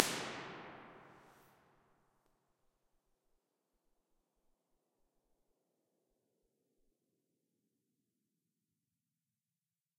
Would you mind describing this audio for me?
Finnvox Impulses - EMT 2 sec
convolution, Finnvox, impulse, ir, response, reverb, studios